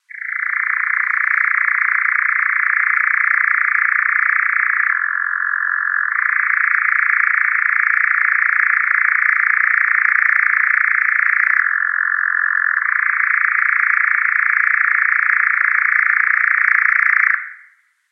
A dual mono field recording of an European Nightjar ( Caprimulgus europaeus ) all other sounds edited out.I wanted to get the wing claps as well but the midges beat me into submission. Rode NTG-2 > FEL battery pre-amp > Zoom H2 line-in.
bird, caprimulgus-europaeus, field-recording, mono, nightjar